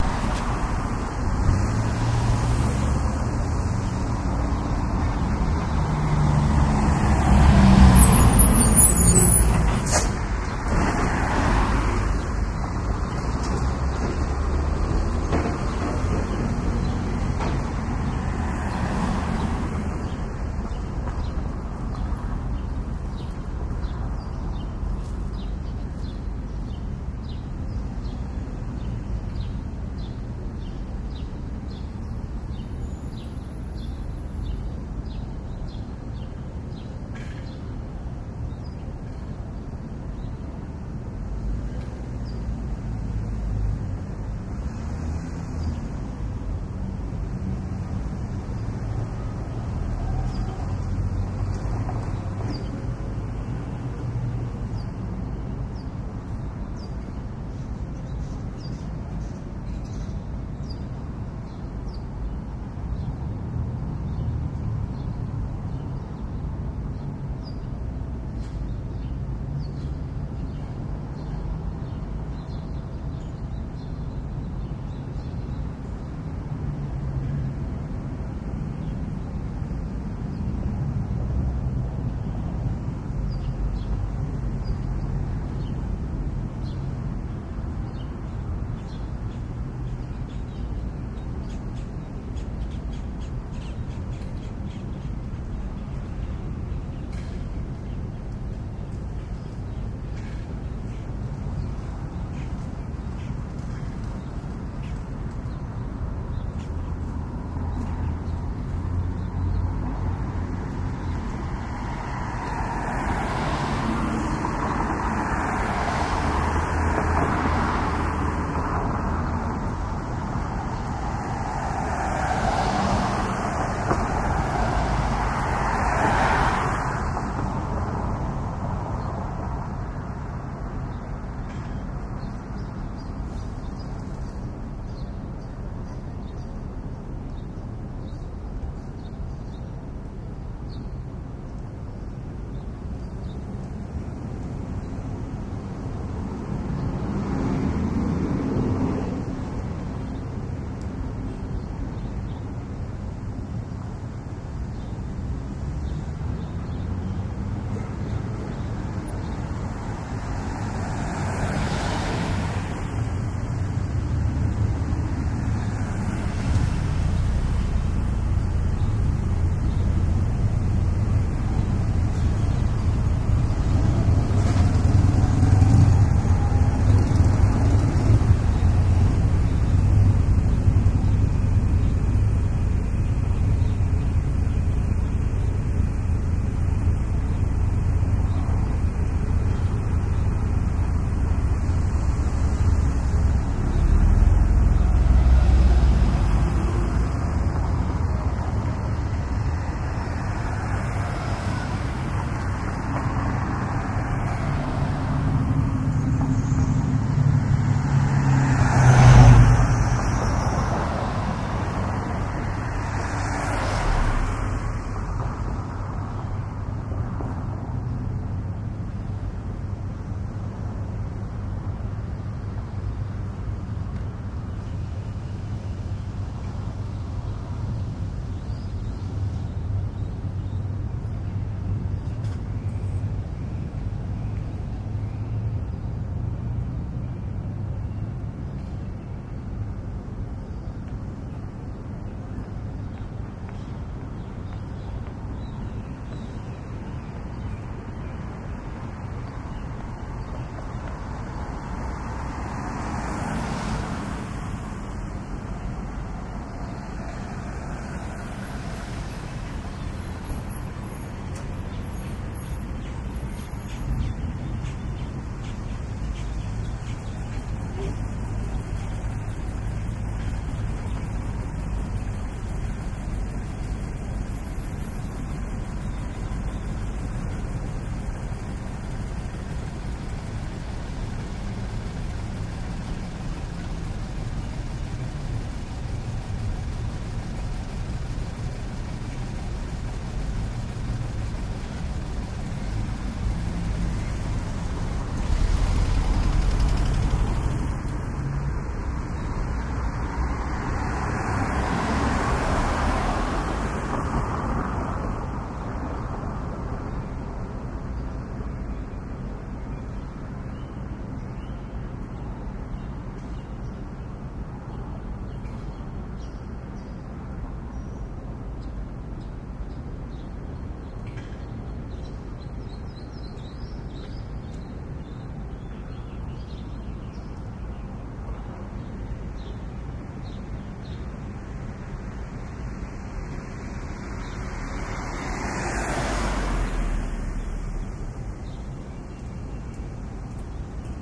Birds and trafffic.
garage, parking, morning, traffic, birds